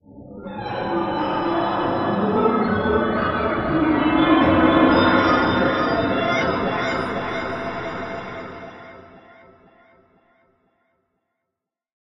Voices in the Hall
FX type sound I did in MetaSynth.